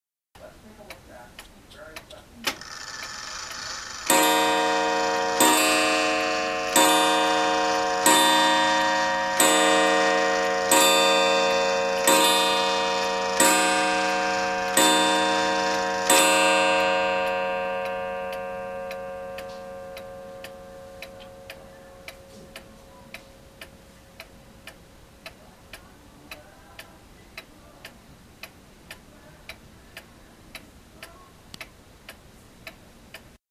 Chimes and ticking of an antique wood, glass, and metal Seikosha brand schoolroom clock (ca. 1910 or earlier). Background noise is audible in this clip (distant voices, television).